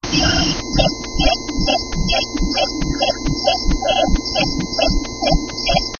[AudioPaint] alphabet symbols
Created with AudioPaint from a pic of alphabet design.